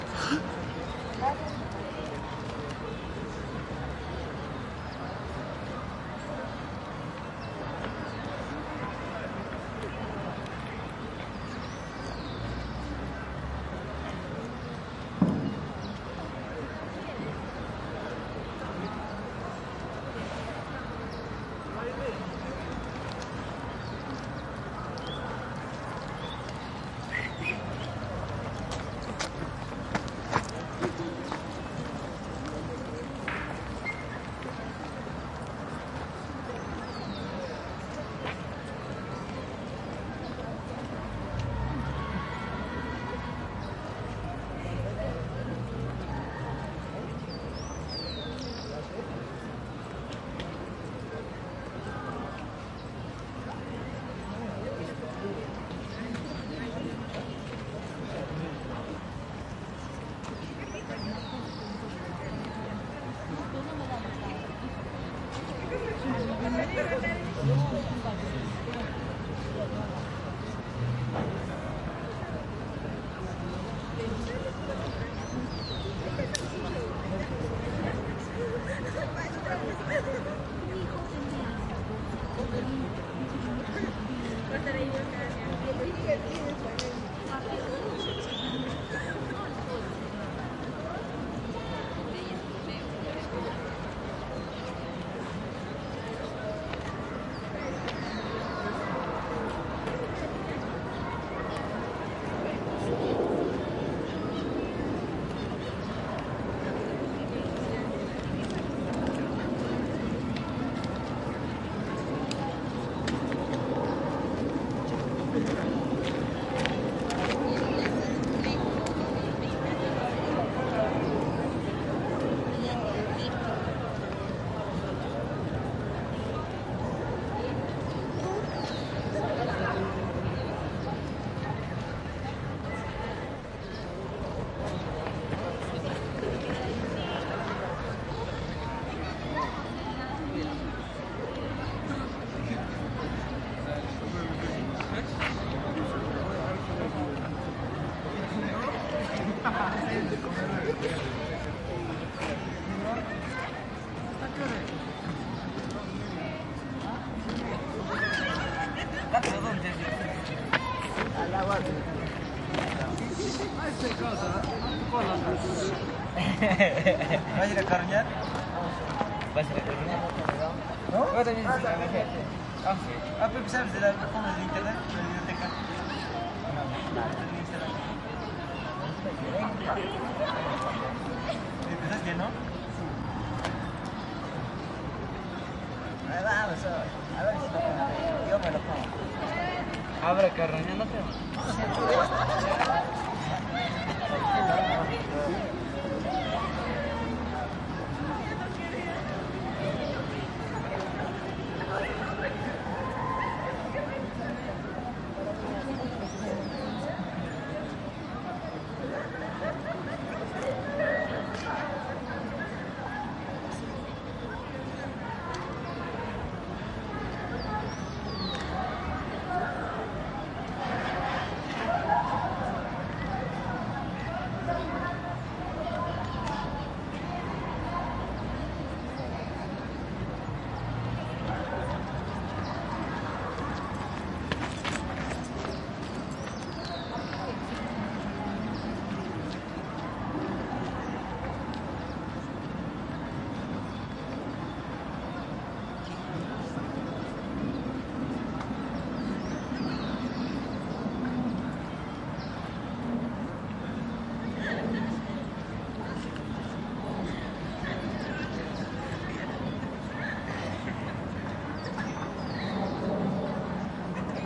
university campus downtown distant traffic and nearby students hanging out spanish +some people and groups walk by steps Cusco, Peru, South America
university, South, Peru, America, downtown, spanish, campus, college, traffic, students